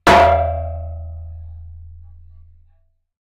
The sound of hitting a metal chair was recorded using a contact mic and a Zoom H4N.